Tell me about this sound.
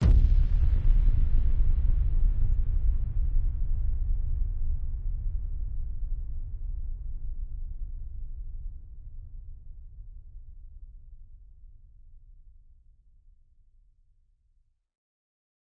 impact-rumble-hard
A deep, rumbling impact. EQ'd, smashed with compression and reverb + echo.
bass, dark, deep, hit, impact, reverb